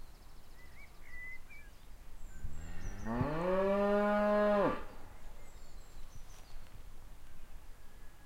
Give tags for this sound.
cattle moo farm cows mooing farm-animals cow countryside lowing

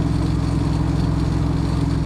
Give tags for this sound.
Motor Car Truck Engine

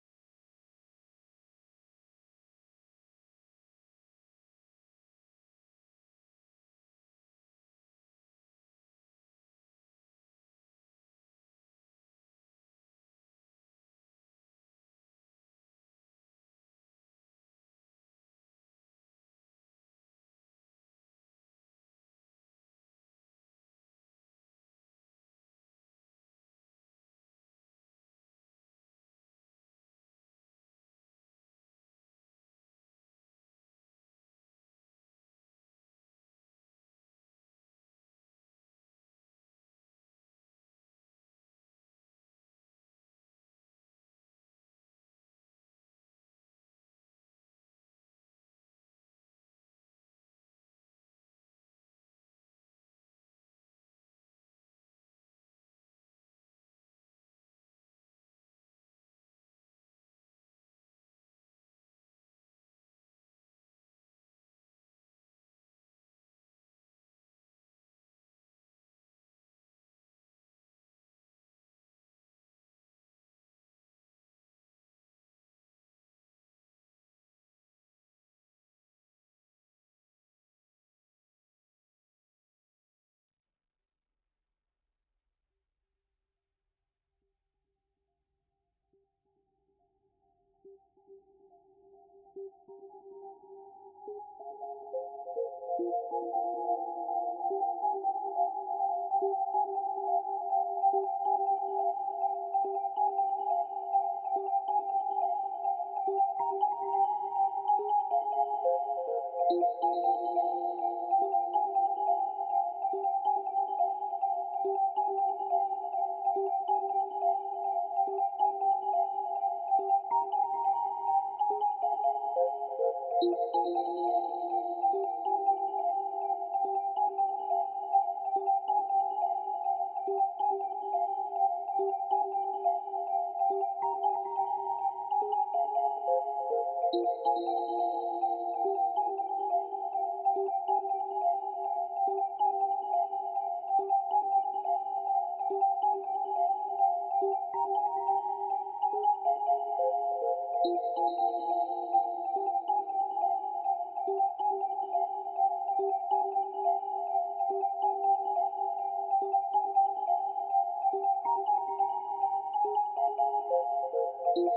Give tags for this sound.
Melody Stem

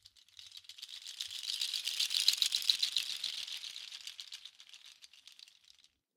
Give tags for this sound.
Cascos mar viento

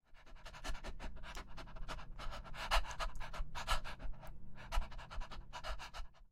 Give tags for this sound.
animal pant dog

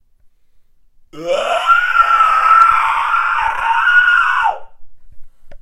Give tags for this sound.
vocal sound tense natural

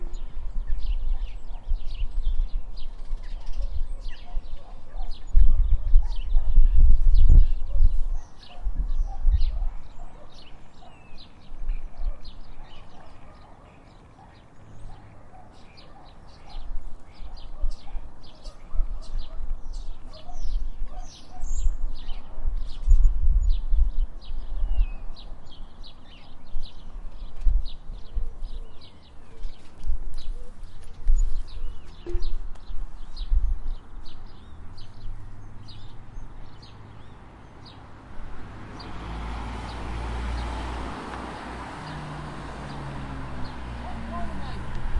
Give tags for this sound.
abstract air ambient design effect filmscore fx outside sfx sound sound-design sounddesign summer tone warm

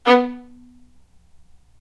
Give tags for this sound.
spiccato violin

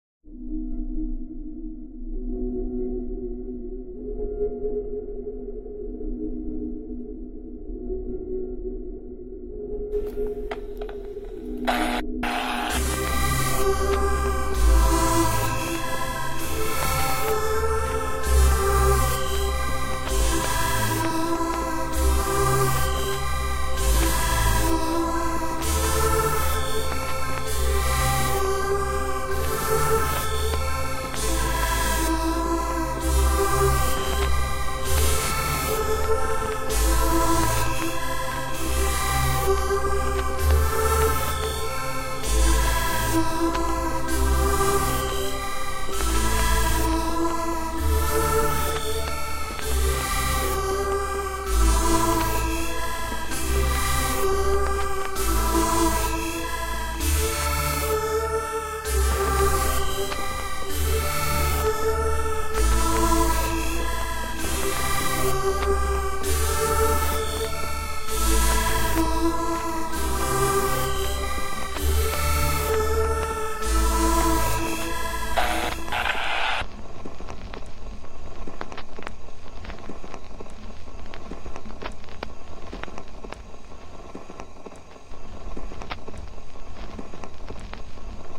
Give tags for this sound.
space wave future radio star sounds SUN

